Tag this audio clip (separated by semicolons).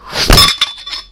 armor; battle; hit; knights; medieval